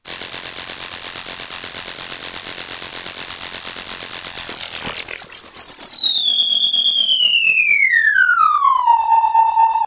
Created with granulab and cool edit from mangled voices inbred by jillys arcade sounds.
synthetic noise pitching down
granular, jillys, synthesis